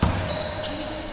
bounce chirp 1
Noise produced by a bounce of a ball and noise produced by friction with the shoes and the wood floor.
drop, chirp, TheSoundMakers, floor, bounce, UPF-CS13, sport, basketball, ball